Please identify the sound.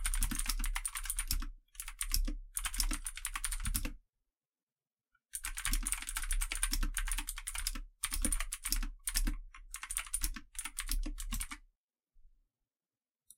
Keyboard Typing
typing, Keyboard